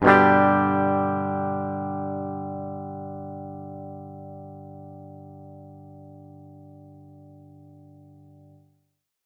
Clean G Chord
A guitar chord, probably a G.
Recorded for the purpose of testing out guitar DSP effects.
Recording details:
Gibson Les Paul Junior, P90 pickup, Mahogany neck, Ernie Ball Beefy Slinky 11-54, Dunlop 88mm.
Recorded through the instrument input of a Focusrite Saffire Pro 24.
Edited in Ableton Live, no processing other than gain and fade.